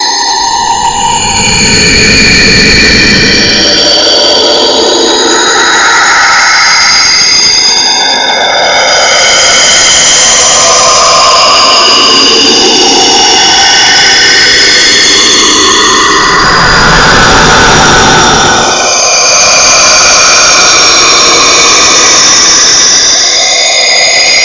cyberinsane paulstretch
just another one of my sounds but paulstretched